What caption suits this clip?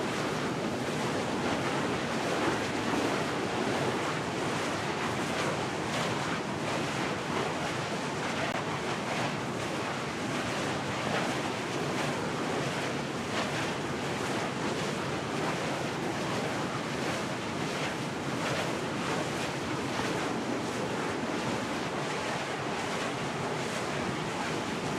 Ambiente - parque eolico
ambience from a Wind farm
MONO reccorded with Sennheiser 416